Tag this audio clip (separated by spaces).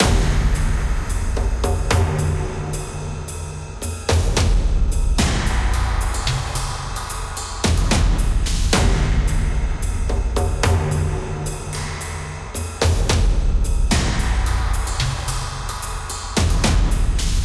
rhythmic percussion-loop drums loop cinematic-percussion